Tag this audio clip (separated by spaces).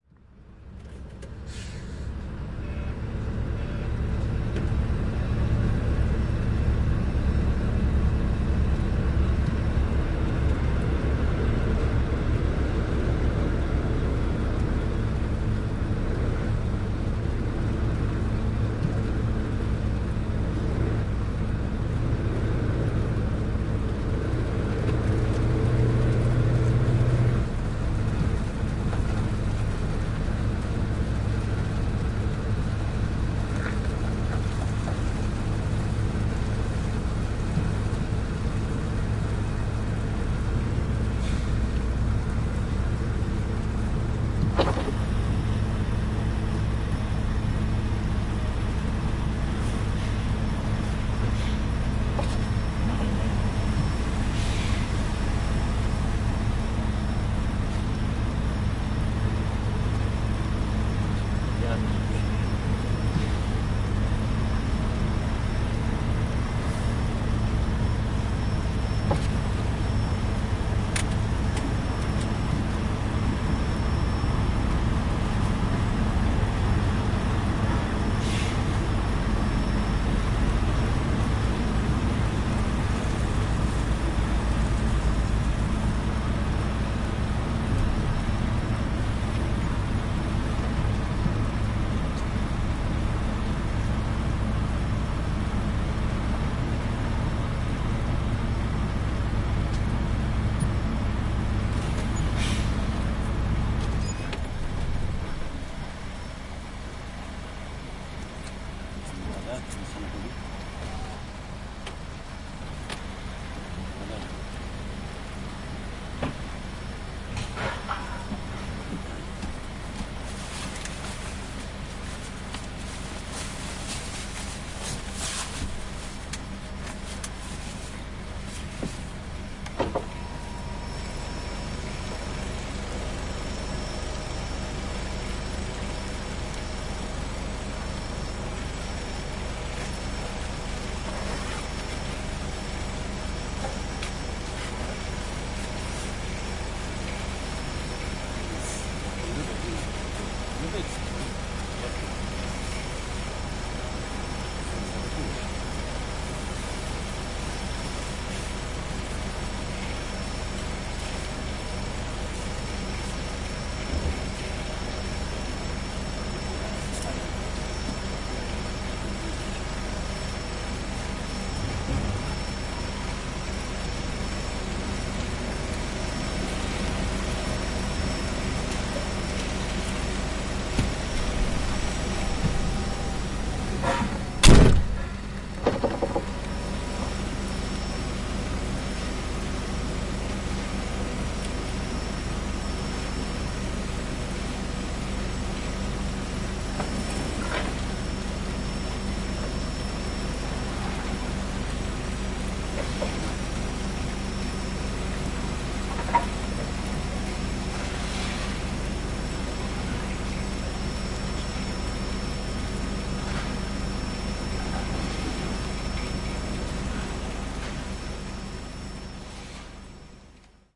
factory field-recording neuenkirchen truck-cab germany